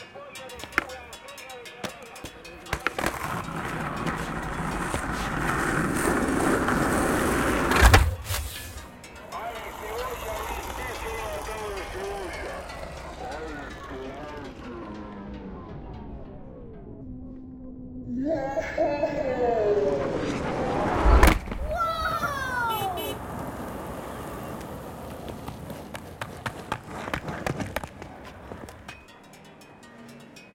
A skateboarder ollies a 17 staircase
skateboard, ollie, plaza